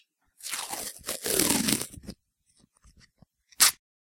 strip off duct tape sound, recorded with a Sony MZ-R35
off, duct, strip